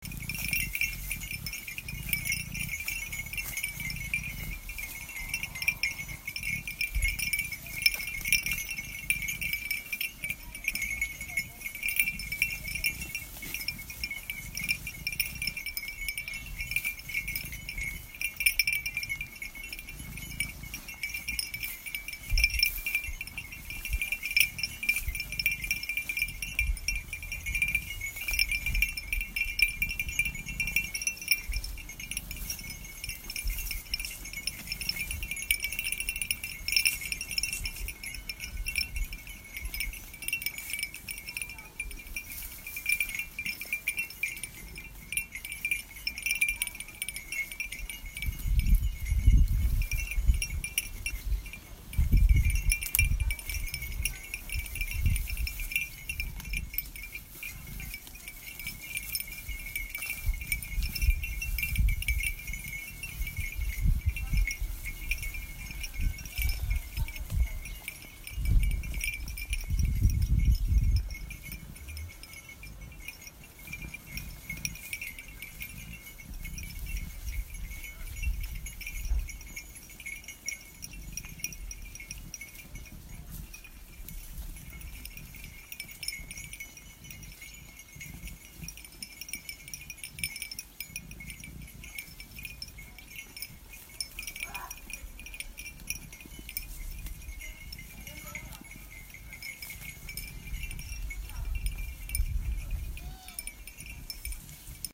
Sheeps Eating Grass